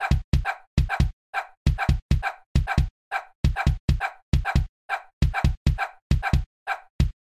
bombo con -11 de sincopa